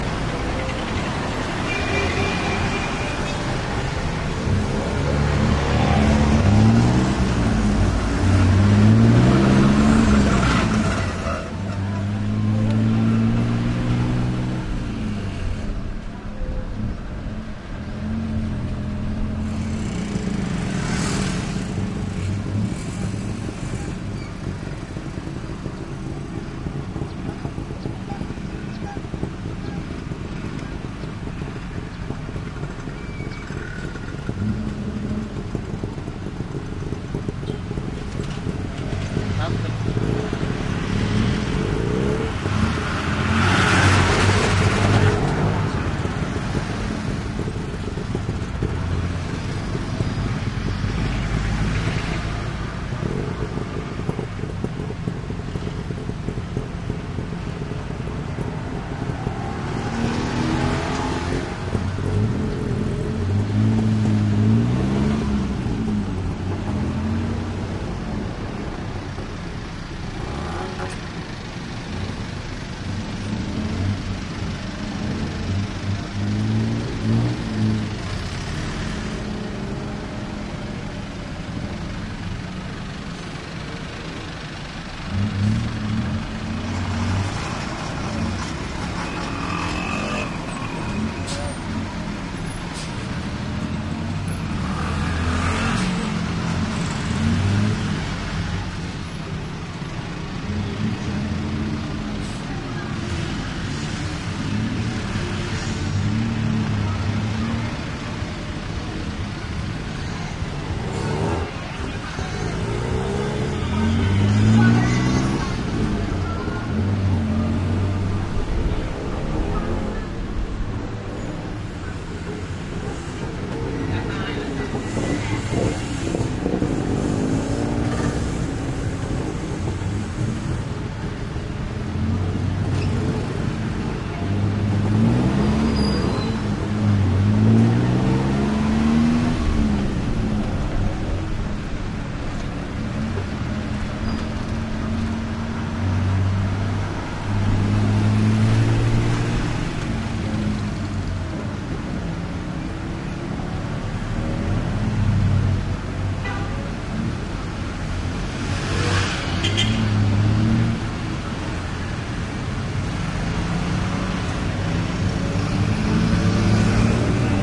Thailand Bangkok tuk tuk motorcycle taxi on board ride through heavy traffic passenger perspective